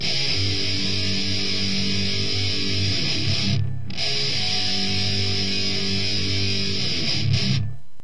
groove loop 5
i think most of thease are 120 bpm not to sure
1, groove, guitar, hardcore, heavy, loops, metal, rock, rythem, rythum, thrash